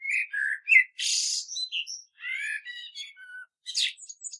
Turdus merula 04
Morning song of a common blackbird, one bird, one recording, with a H4, denoising with Audacity.